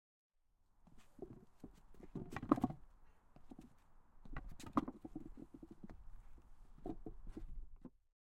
7 Walking the footbridge
Walking the footbridge